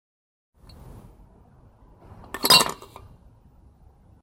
Sound of an empty bottle dropped into a trashcan full of empty bottles.
bottle, drop, empty, garbage, glass, trashcan